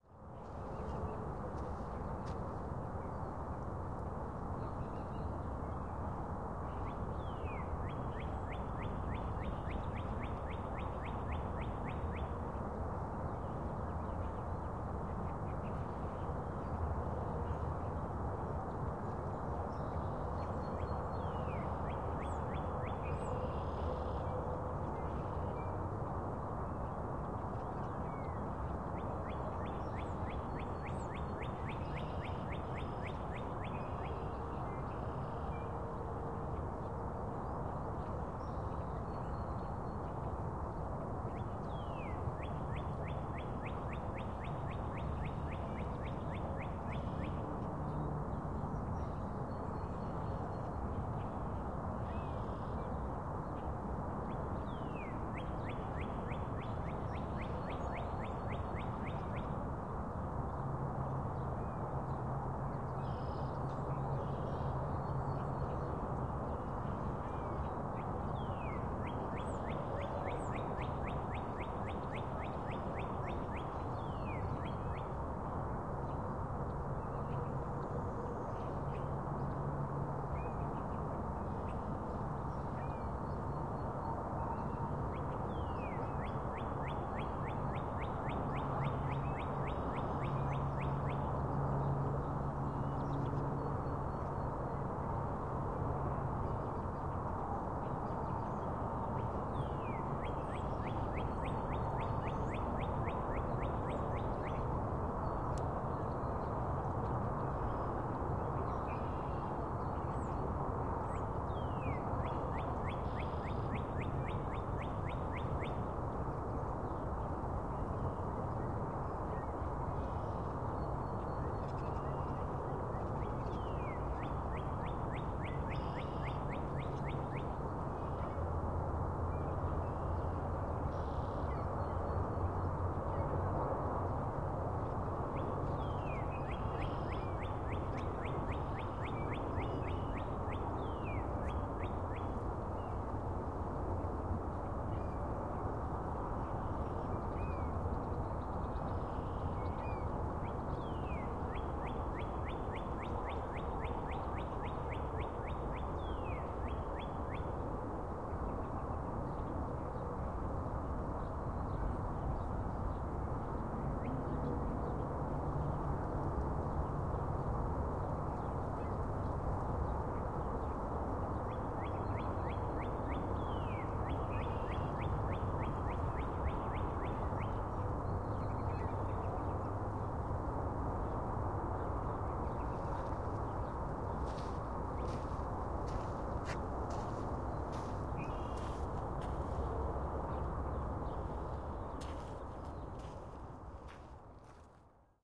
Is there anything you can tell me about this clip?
birds at dawn of spring
Well, the pond area still had a layer of ice on it, but the birds were getting chatty anyways. Spring may eventually crack around here…
You can hear a freeway in the background, just pretend it's a river, m'kay?
Recorded March 20, 2014 near Chicago. I achieved great detail with equalization, but it’s uploaded here au natural so you can do as you like. Cheers!
field-recording, nature, midwest, freeway, birds, pond, chirp, spring, ambiance